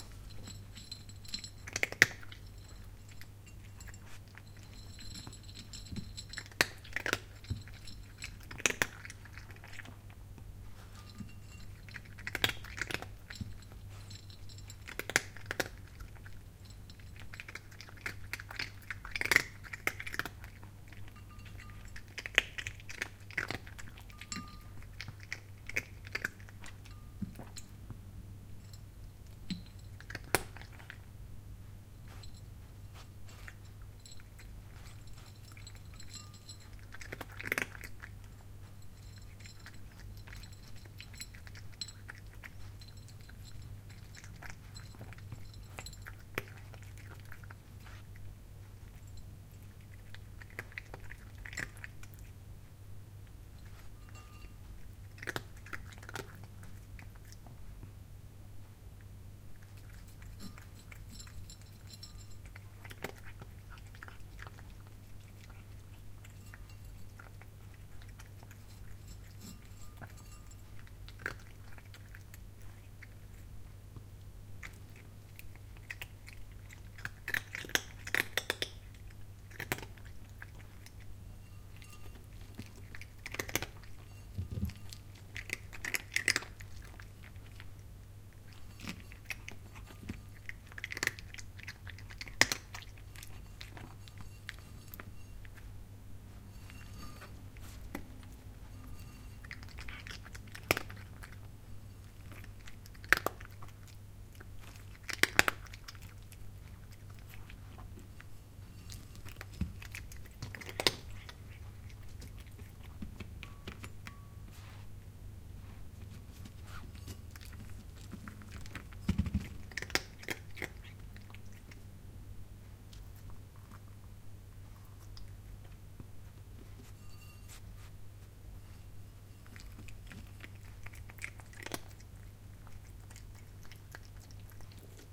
Cat Eating Dry Food
A friend's cat eating dry cat food. Yummy!
Recorded with a Zoom H2. Edited with Audacity.
Plaintext:
HTML:
bite, cat, chew, chewing, crack, eat, eating, feline, food, mouth, munch, nom, nom-nom, pet, zoom-h2